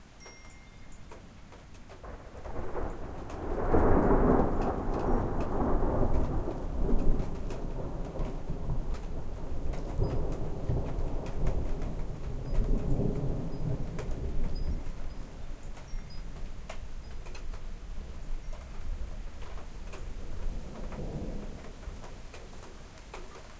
Sky thunder 002
Equipment: Tascam DR-03 on-board mics w/ fur windscreen
Thunder going off higher in the sky.
crash, storm, rumble, weather, lightning, nature, boom, bang, thunder, field-recording, bass